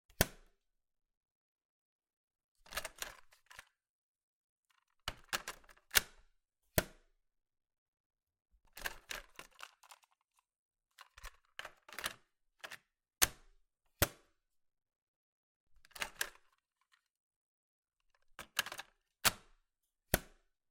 tape cassette machine Tascam 424mkiii insert eject
Cassette insert and eject sounds for the listed cassette recorder
cassette, sfx, button, machine, tape, AudioDramaHub, sound-design, postproduction